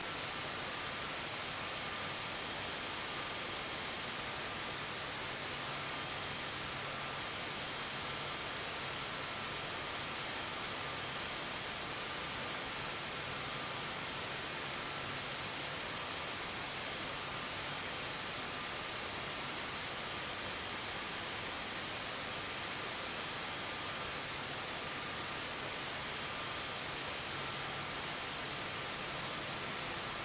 Radio Static FM Faint signal
Some radio static, may be useful to someone, somewhere :) Recording chain Sangean ATS-808 - Edirol R09HR
fm, noise, radio-static, tuning